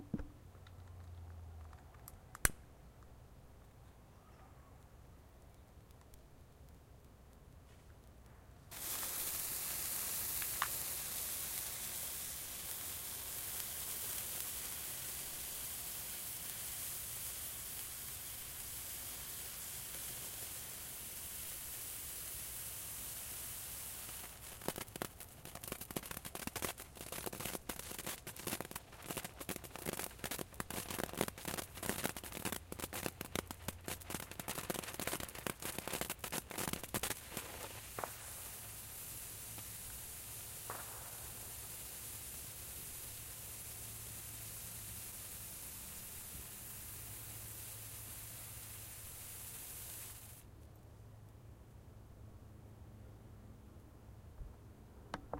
Here's a sound bite of me setting off a Morning Glory firework (essentially a sparkler, for the unaware). It almost sounds like a fuse going off if you ask me. Could be good for a fuse sound for a project. Recorded with a Zoom H4N.
spark, fuse, firework